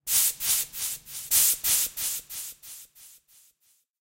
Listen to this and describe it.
Air Hose Delayed
hose, air, delay